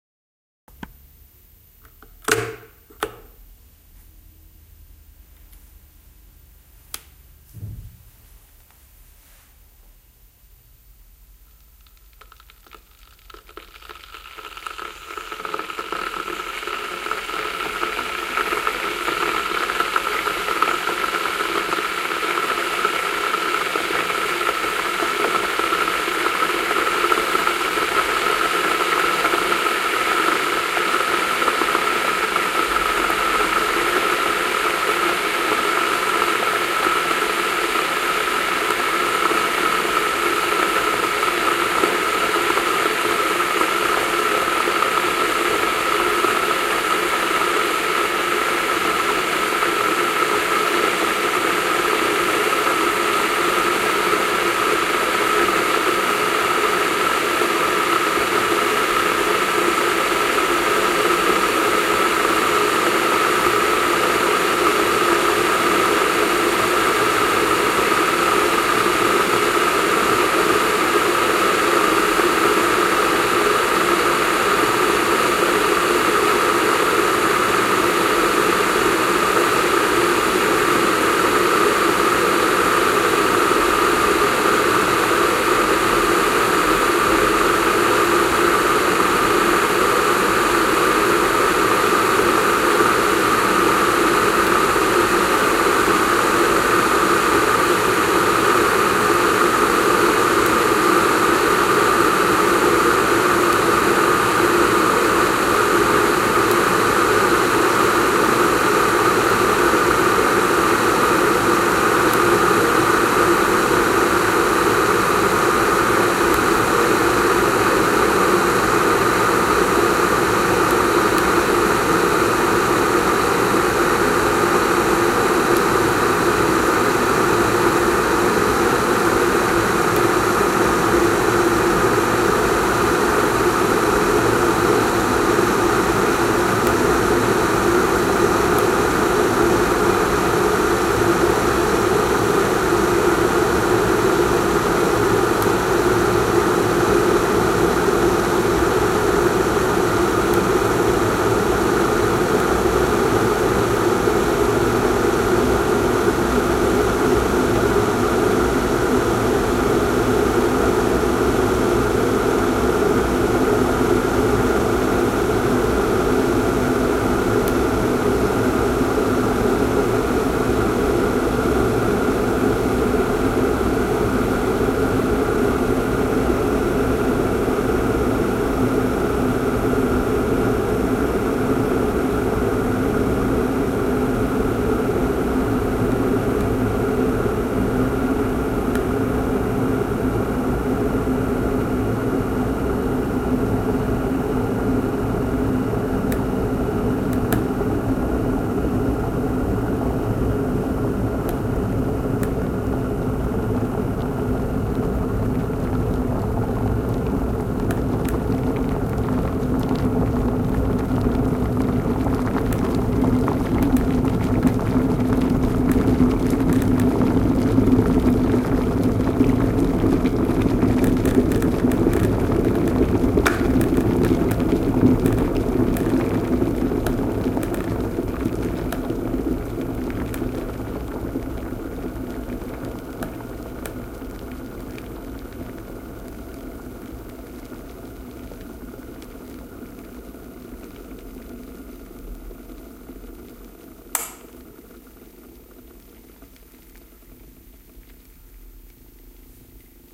sound of an electric kettle boiling water. including the clicks of switching on and it automatically switching off.
recorded with a Roland R-05